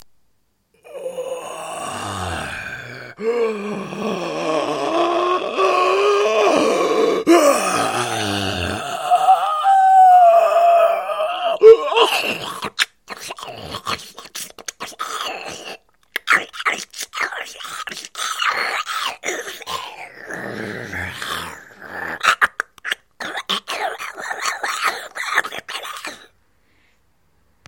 I love to hear whats done with it. Only my voice was used. No effects.